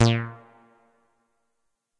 moog minitaur bass roland space echo